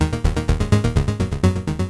An echoed bass sequence.
electronic, bass, slapback